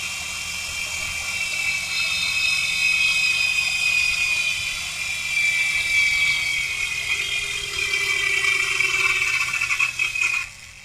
General engine shutdown--another sound made with my remote-controlled helicopter.